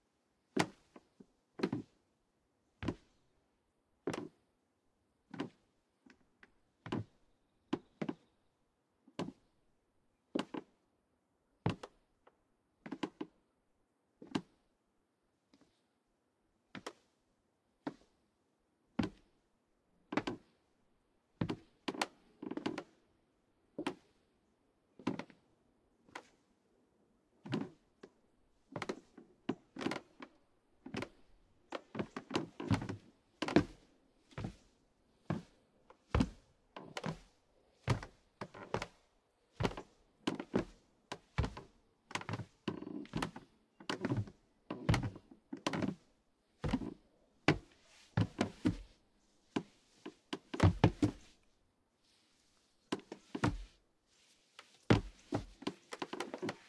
Footsteps - Wood Deck, Sneakers
Individual footsteps (sneakers) on a wooden deck during the day. Creaking noises. Some noise reduction applied and cricket sounds removed.
creak, deck, feet, Foot, shoe, sneakers, step, wood